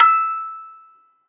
metal cracktoy crank-toy toy childs-toy musicbox
crank-toy, metal, toy, cracktoy, childs-toy, musicbox